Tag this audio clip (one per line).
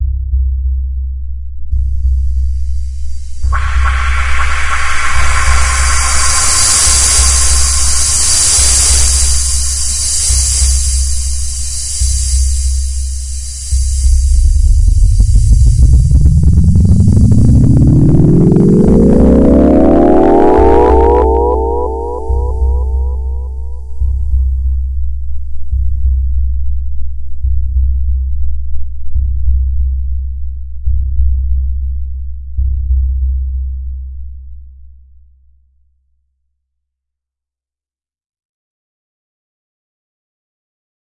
alien
ship
sound
space
strange
voice